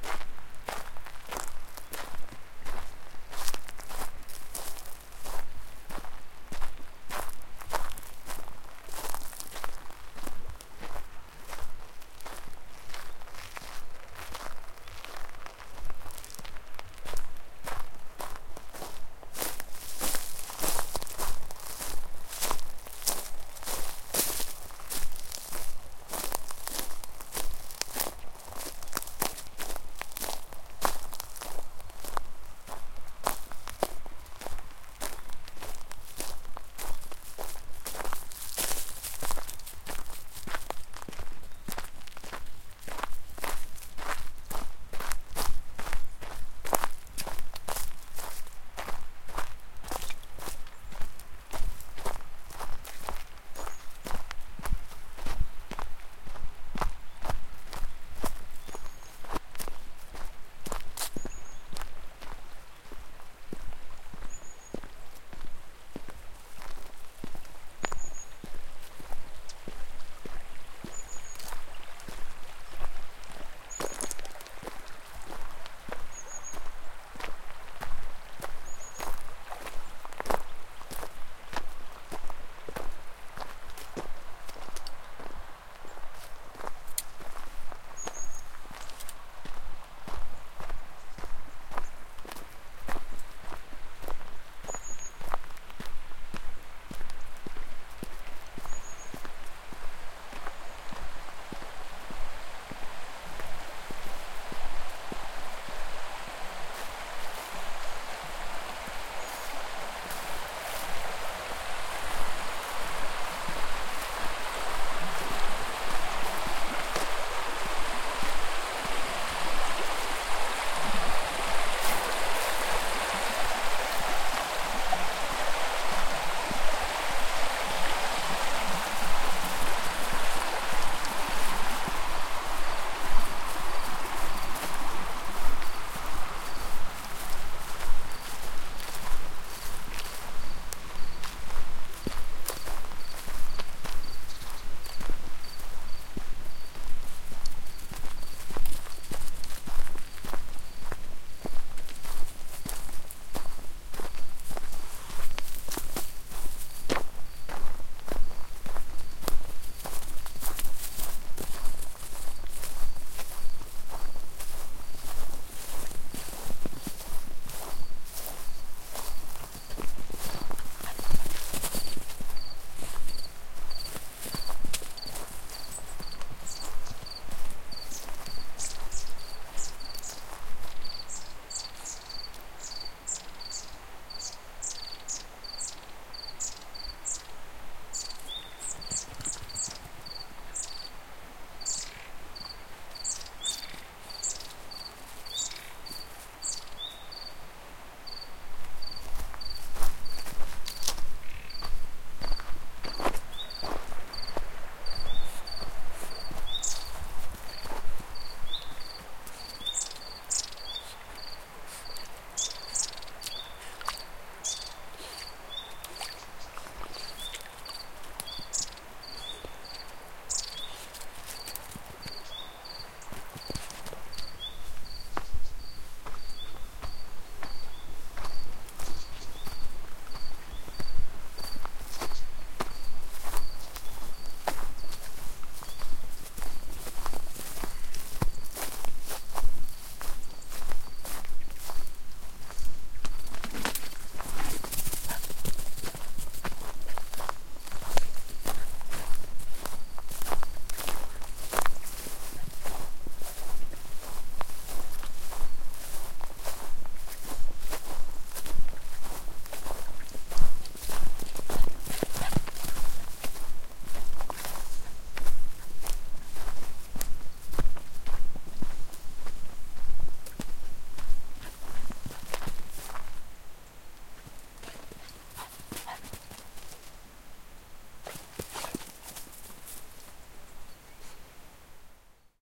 A walk / Paseo
Awaking in the nature, walking with my dog, discovering our footstep sounds on different ground… then the river, the water… birds beginning chanting and an old truck sounds far away.
Despertando en la naturaleza, dando un paseo con mi perro, descubriendo el sonido que producen nuestros pasos en distintos suelos… entonces llegamos al río, el agua… los pájaros comienzan a cantar y el sonido de un viejo camión se oye en la lejanía
Recorder: Tascam DR40 (internal mics – XY position – with windshield)
campo, pasos, naturaleza, tascam-Dr-40, phonography, montaa, cazorla, footsteps, walk, perro, nature, dog, paseo, field-recording, recorder